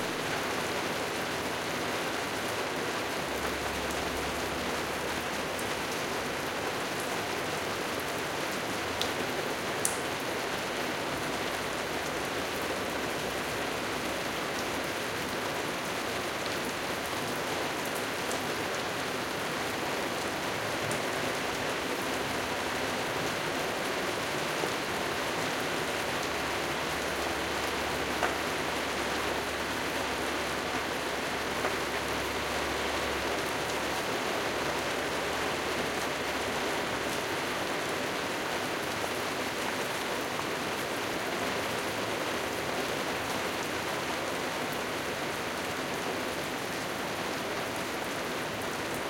Medium perspective of rain on window recorded from inside a reverberant room. It's a nice false rain pouring during a shooting but sometime we hear drips from outside.
interior, rain